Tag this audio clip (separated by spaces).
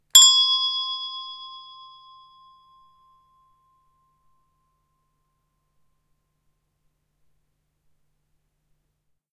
bell bright hand instrument percussion